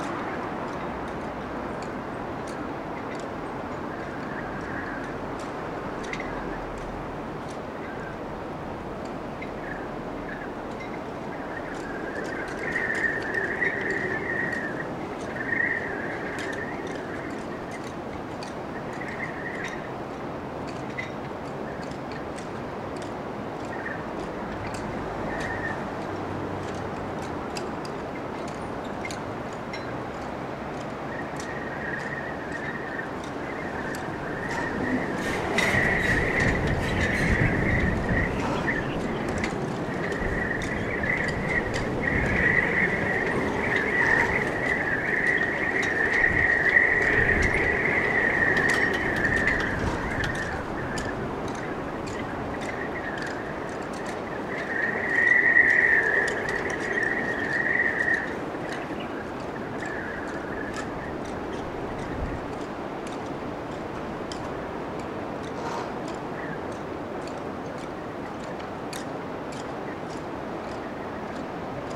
Recorded in Watchet harbour in Somerset, UK, a few hours before a huge storm hit the little town. The wind is whistling through the rigging on the masts of the boats in the harbour, producing an eerie whine. Recorded with an Sennheiser ME-66. Mono.